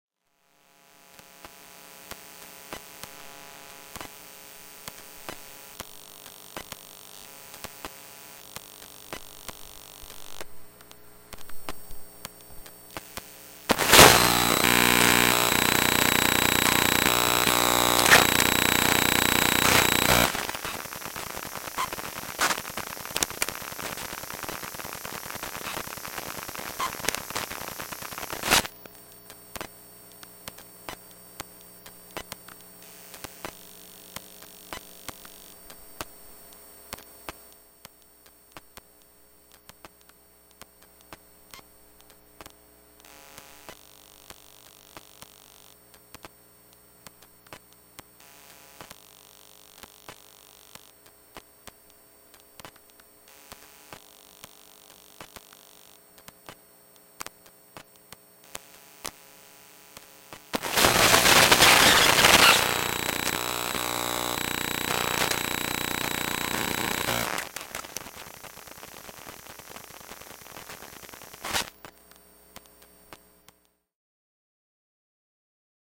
Iphone 7 Electrical sounds
Iphone 7 electromagnetic sounds
LOM Elektrosluch 3+ EM mic
coil, electromagnetic, pick-up, unprocessed, electrical, noise, appliance